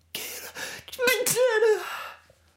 Sneeze held but it escaped (tried to sing but sneezed instead)
I tried to sing but sneezed instead. It's one of those sneezes you try to hold.